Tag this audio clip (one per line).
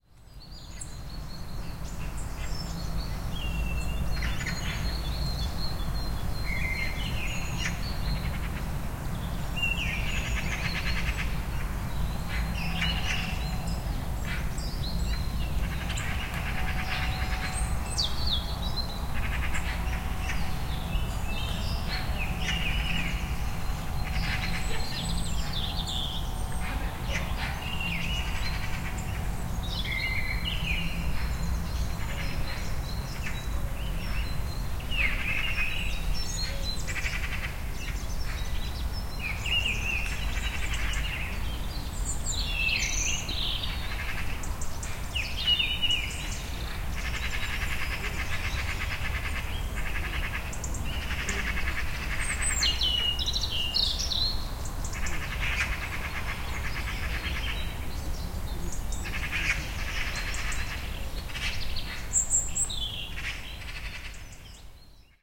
park
atmosphere
tit
le-mans
background
spring
field-recording
nature
general-noise
blackbird
birds
raven
ambient
ambience
sparrows
soundscape
sparrow
ambiance
bird
birdsong